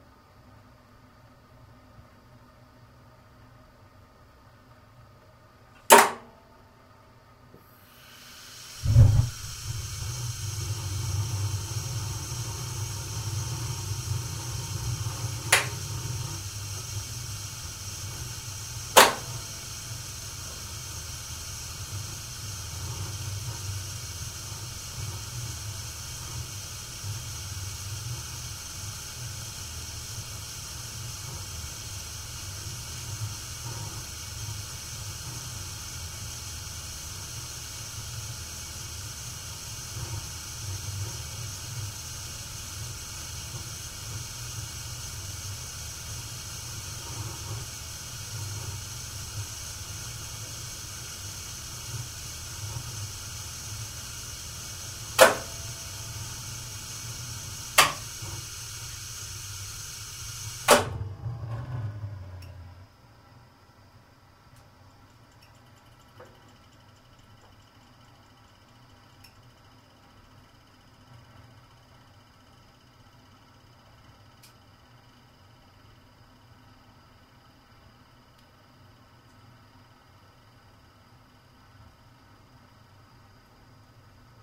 gas boiler start stop 02
Stereo recording of a gas boiler starting (2). First there is turned a rotary switch connected to some relay. Then gas ignition is heard and after that boiler is doing its work. Some switches are heard when it's running as well.
The Boiler is switched off in a minute approx. and then it makes some noises during getting cold. Unprocessed. Recorded with Sony PCM-D50, built-in mics.
binaural, boiler-room, combustion, drone, heating, hiss, ignition, mechanical, noise, rotary-switch, switch, switch-on, unprocessed